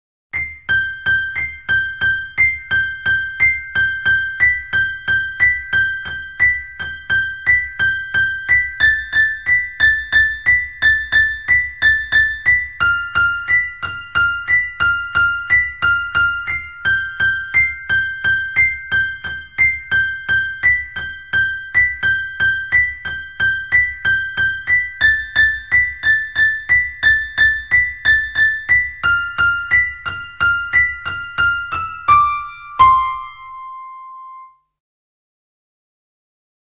A simple piano melody created for the Music Stock of CANES Produções.
It's an easy-to-edit loop, a beautiful and simple melody, i started tapping the keys and this tune reminded me of my childhood, so there's the name for it.